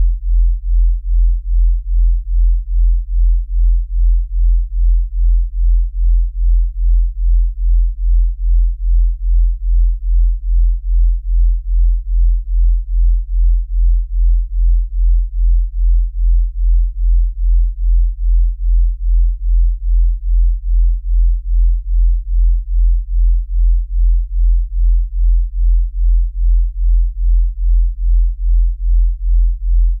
E0 (41 hz) and F0 (43 hz) simultaneously creates a natural harmonic pulse. 30 seconds long.
41hz, 43hz, bass, E0, F0